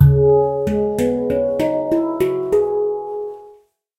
This is the sound of a Shaktipan recorded in Biella-Italy.
It was tested just after tuning this beautiful piece of art.
Shell Material used is Nitrated Steel.
Duration of Making: 98 Days
Maker: Andrea Tonella aka. Shaktipan.